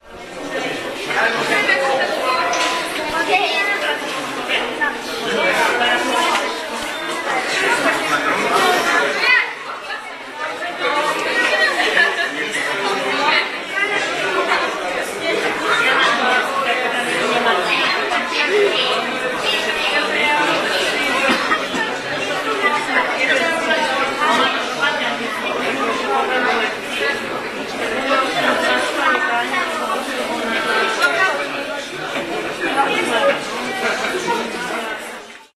waiting for science night show 240910
24.09.2010: 20.30. the crowd of people (parnets with their children and teenagers) are waiting for chemical experiments show during the Science Night in Poznan. the building of Technical University on Piotrowo street.
field-recording, noise, crowd, voices, poland, children, waiting, poznan, hubbub